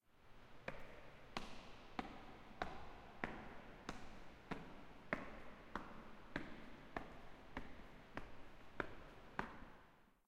Library Foyer Steps
This recording is of walking up stairs in the main foyer of the library at Stanford University.
aip09, library, stairs, stanford, stanford-university, walking